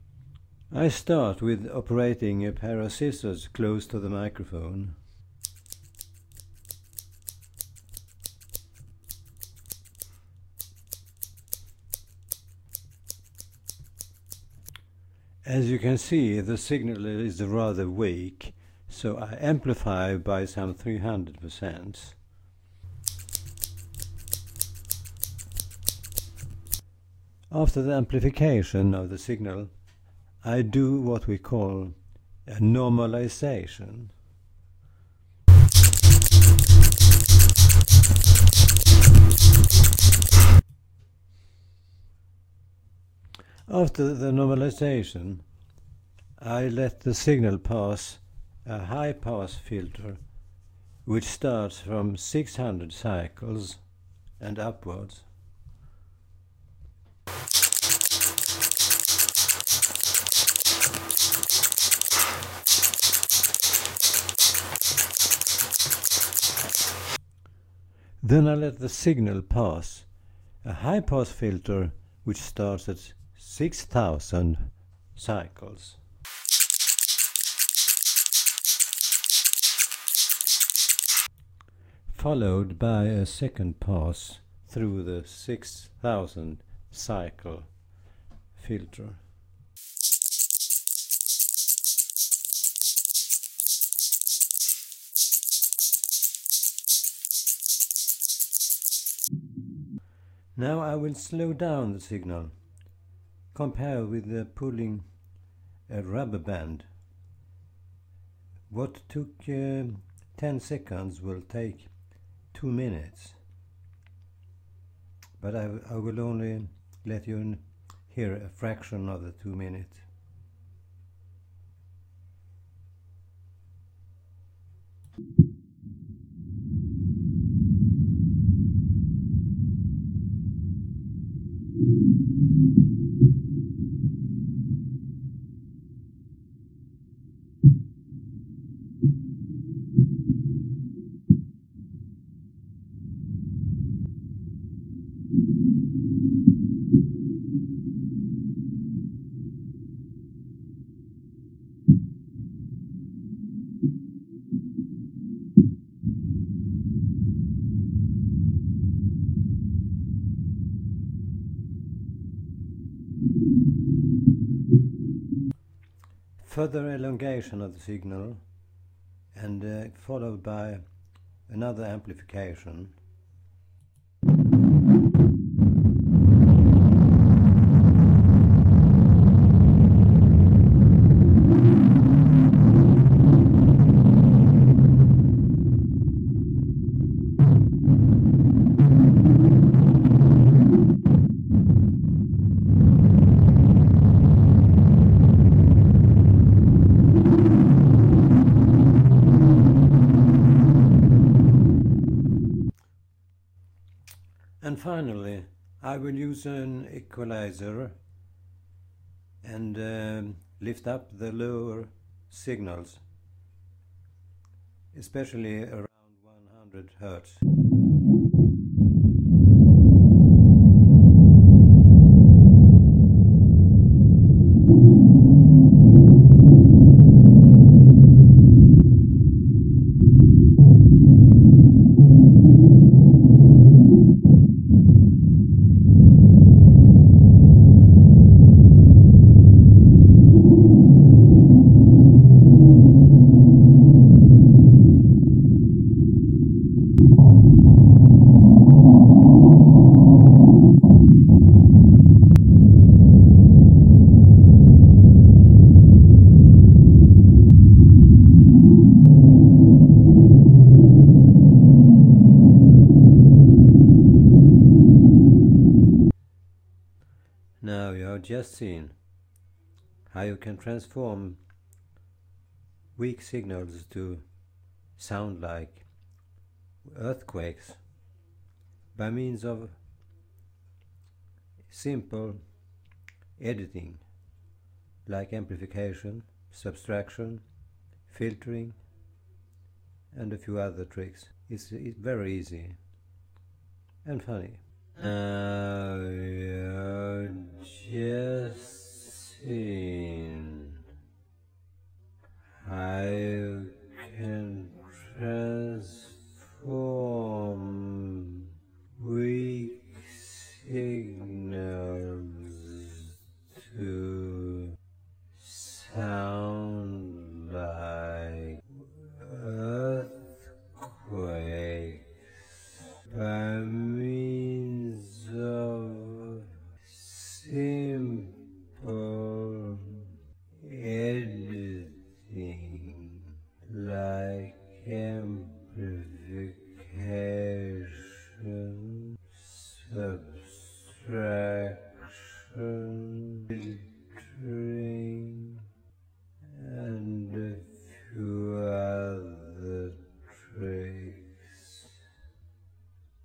Sounds are very ofen a continous wave (cw) which is modulated by a frequency or by amplitude, E.g. 1 kHz is modulated by 10 Hz, A good example is the human voice. Now, I will give you an idea about the wide number of modulations. I recorded the sound of knixing scissors, held close to a microphone: ´siks-six-knix-six-ix...etc. After recording, the signal is amplificated and edited in some eight steps and various modulation steps have changed the tiny six-icks-tix to the sound of a nature catastrophy. Is that possible? Yes, listen to my posting
basics, educational, experimental, modulation, sound